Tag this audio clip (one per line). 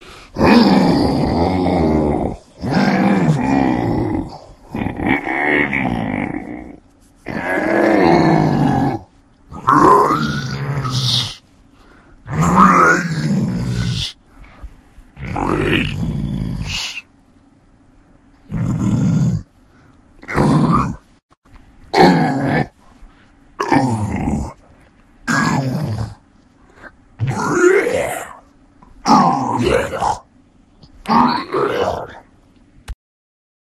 groan
ghoul
moan